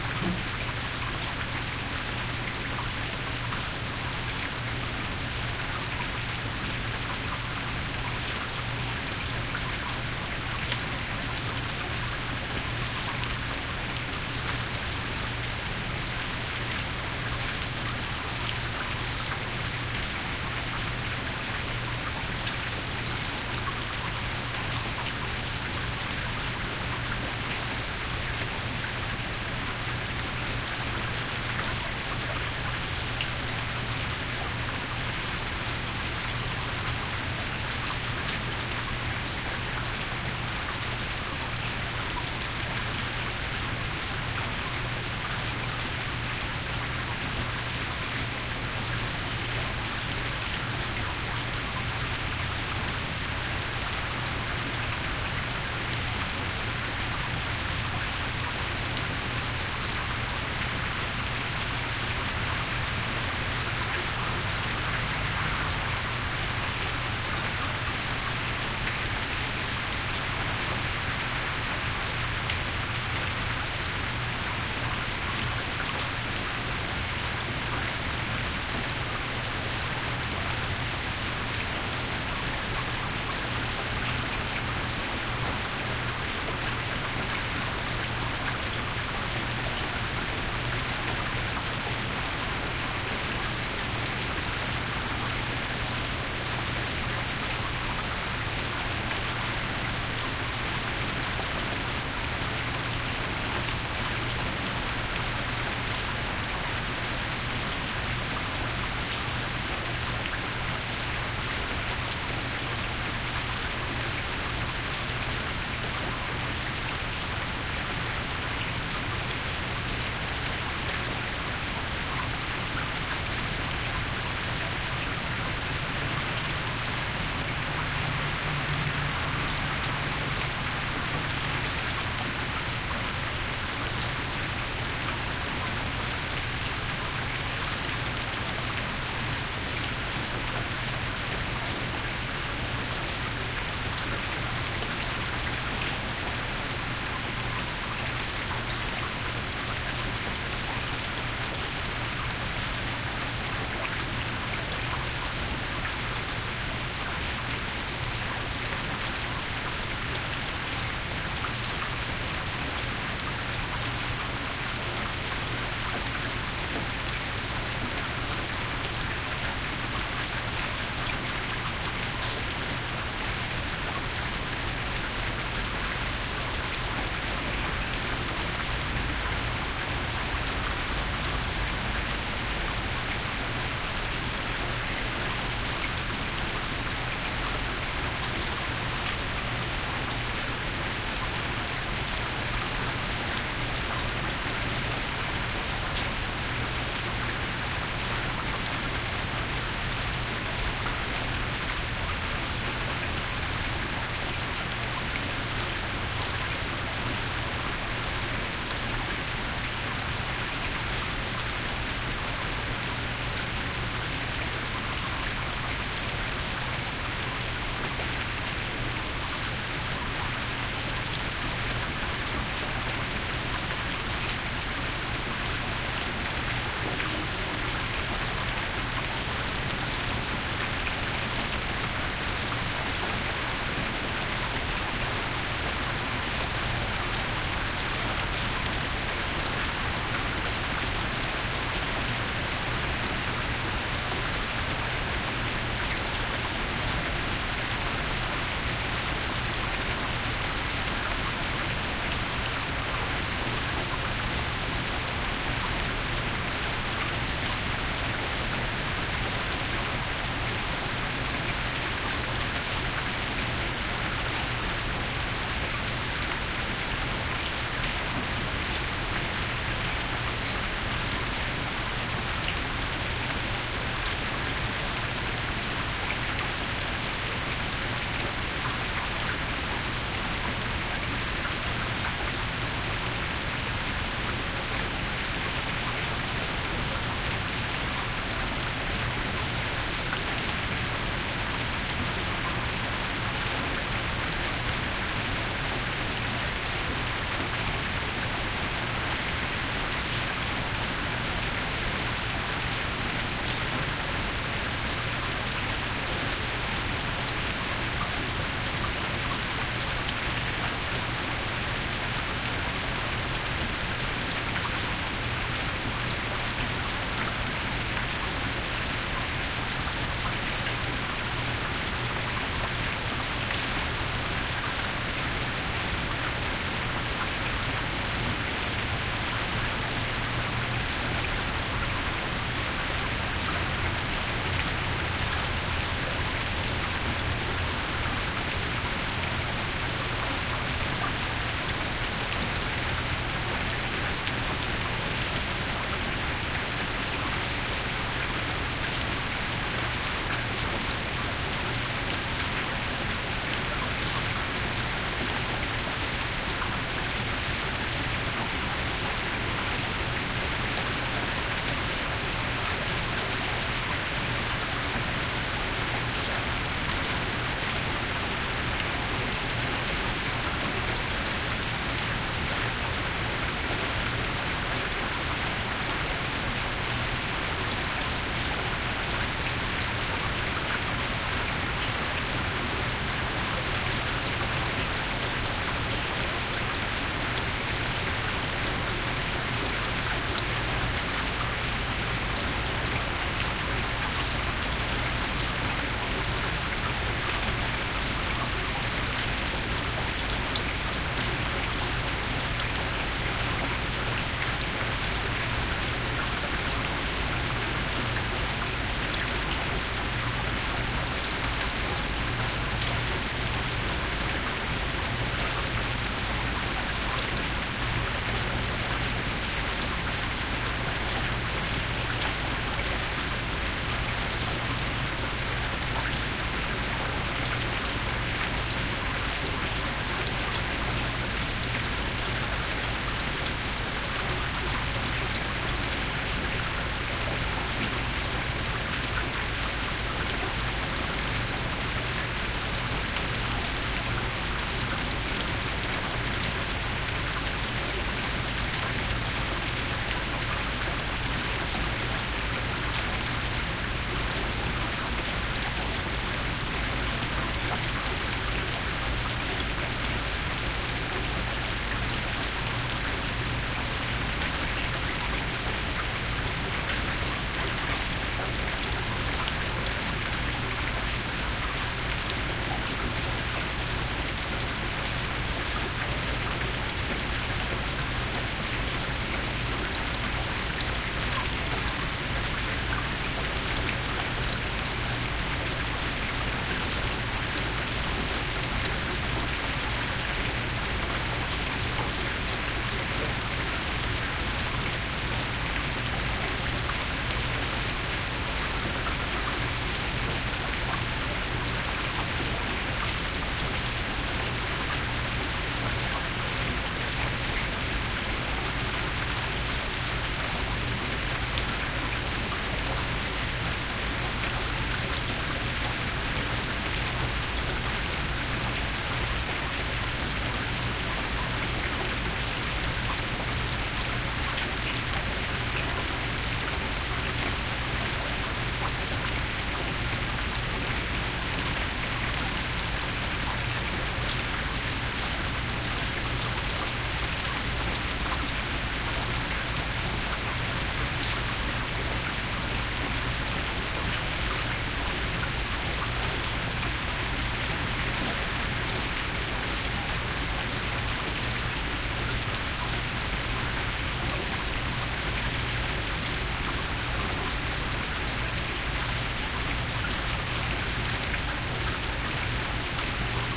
Rain, with some splashing and trickling sounds too. Recorded with 2 devices, an Olympus LS-14 digital handheld, and an Audio-Technica studio mic. The recordings were combined to give a wider stereo effect.